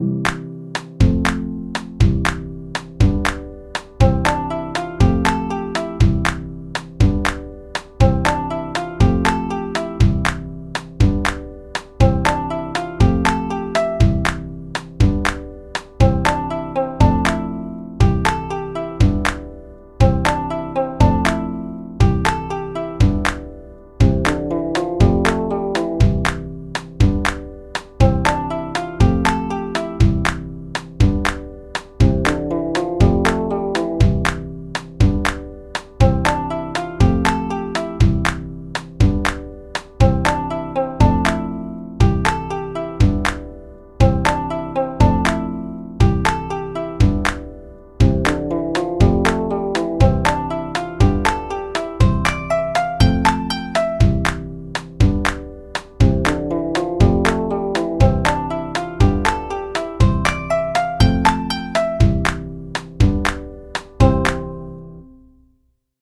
Es una cancion realizado con instrumentos virtuales gratuitos.
It is a song made with free virtual instruments.
alegria, intro, song, video